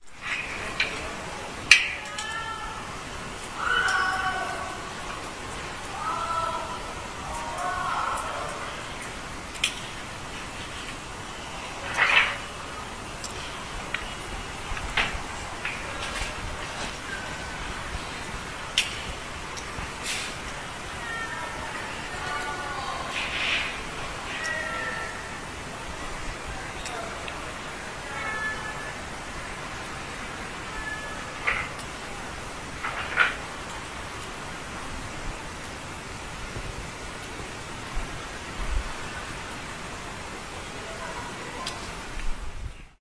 ambience with cat220810
22.08.2010: about 21.00. the tenement courtyard on Gorna Wilda street in Poznan. the evening ambience with a miaowing cat.
ambience, cat, courtyard, field-recording, miaow, poland, poznan